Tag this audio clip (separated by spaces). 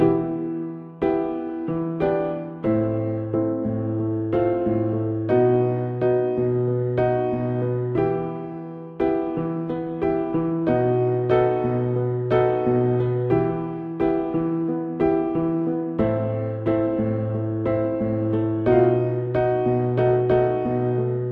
blues calm cinematic easy eminor fraendi gudmundsson keyboard laidback lalli larus movie phrase piano relaxed riff slow